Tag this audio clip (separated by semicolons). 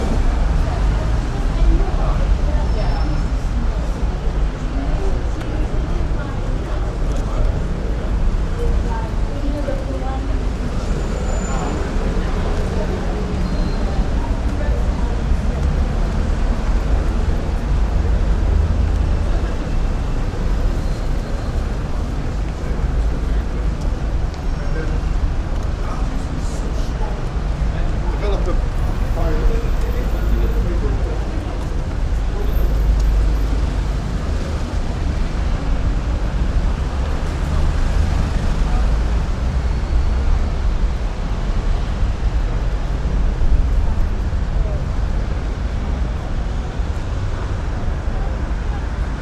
ambience; atmosphere; bus; cars; london; people; public; traffic; walking